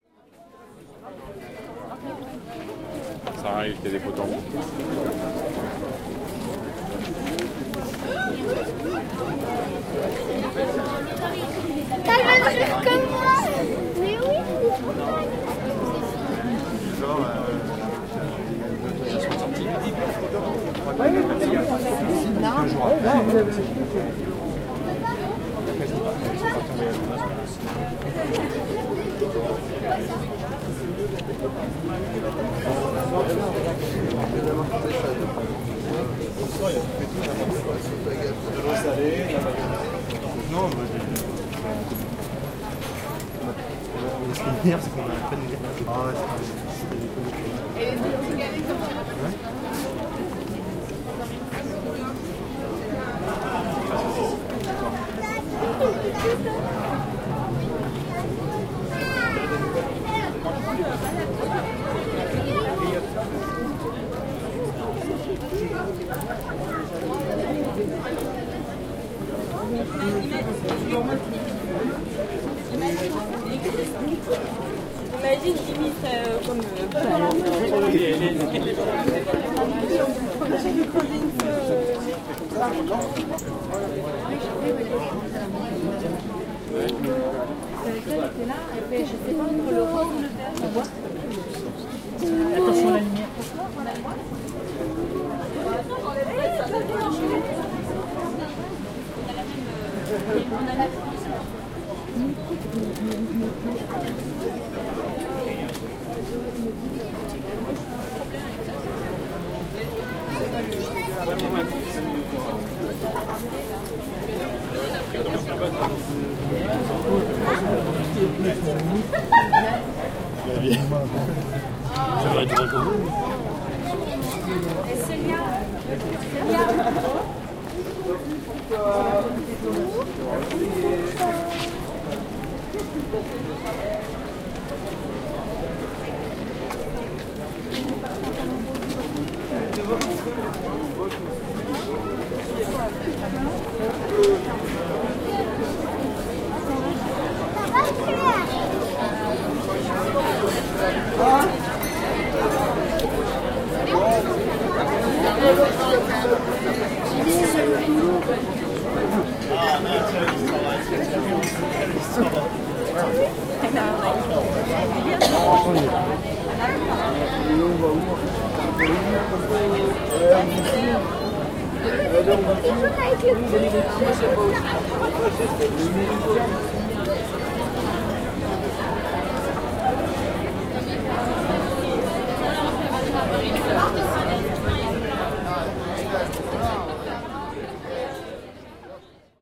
walking thru an animated street along the beach in a little Britanny seaside resort. Voices, french and english language, animation.
ambiance-de-rue, animated-street, Britanny, France, Quiberon, rue-anime, rue-commerante, seashore, street-athomsphere, street-sounds, summertime